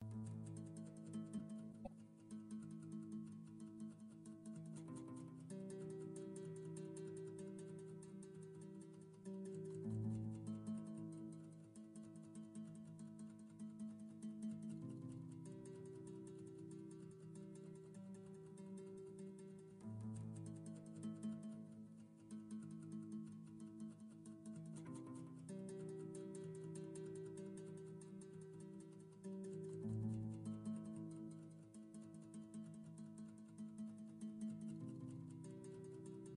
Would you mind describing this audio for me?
Upcoming Situation Guitar Background
This is my own recording for stories, background.
Just my first try.
guitar, background, acoustic, classic, story